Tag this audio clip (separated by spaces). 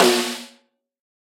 velocity 1-shot drum snare multisample